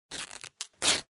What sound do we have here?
A ripping cloth or fabric
Original recording: "Cloth Rip" by Paw Sound, cc-0